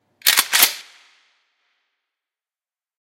A Remington 870's pump being cycled.